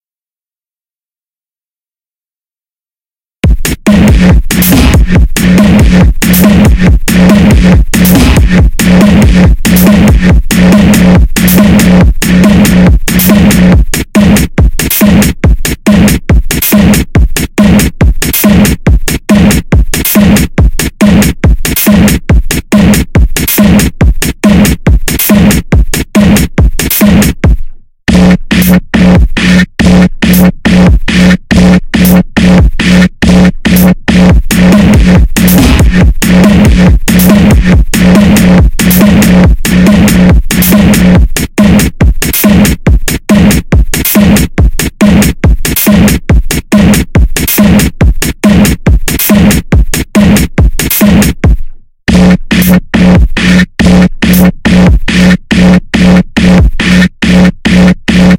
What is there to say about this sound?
bible-drums
Full drums track of Baby